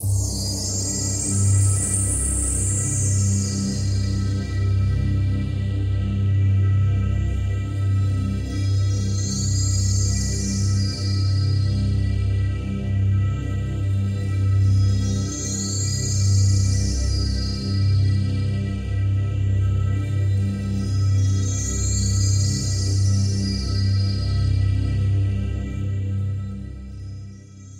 Live Krystal Cosmic Pads